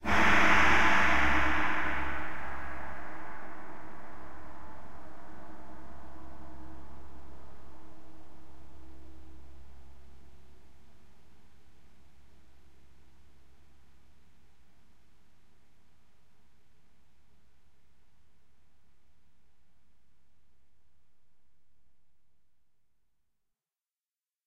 Gong sabi 2
gong, beijing, chinese, Sabian, percrussion, beijing-opera, CompMusic